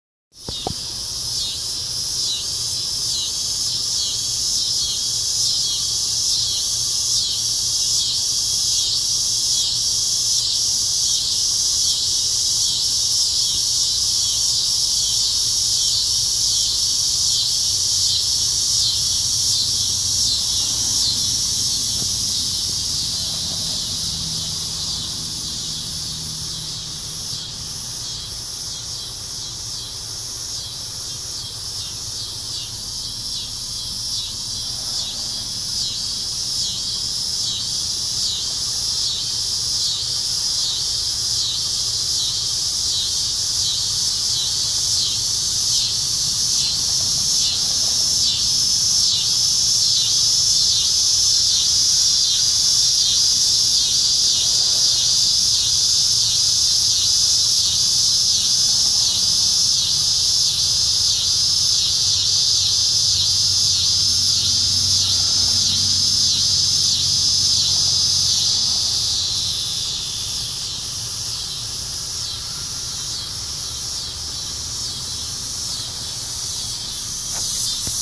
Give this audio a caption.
Cicadas in Kansas USA